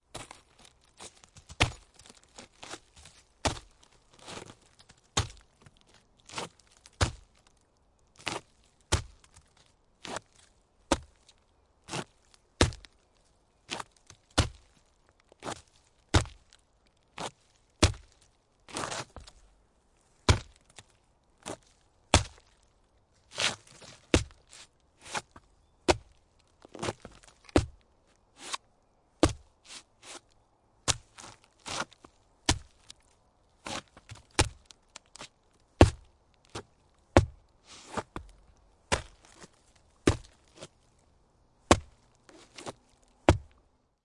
jump land kick stomp wood debris impact footstep sole m10
Kicking on stump. Recorded with Sony PCM m10